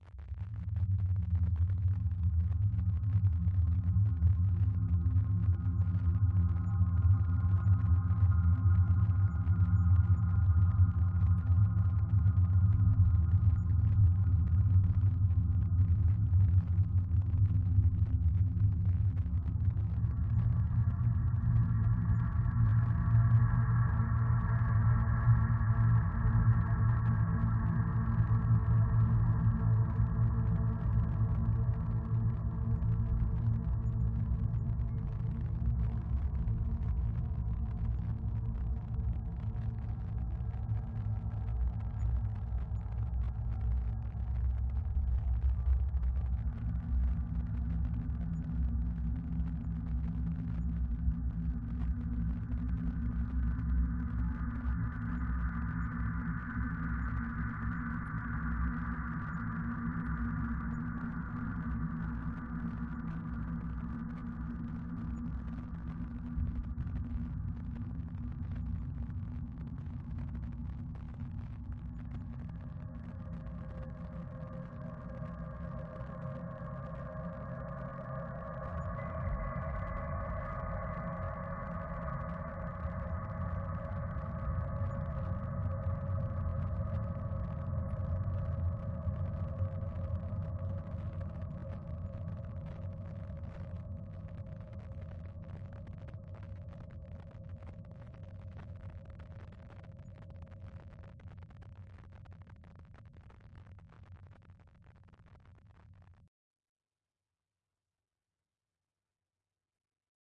Future Garage (Ambient Textures) 03
Future Garage (Ambient Textures)
Opening/Ending